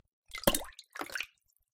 Water drop 4
splash, Water, water-drops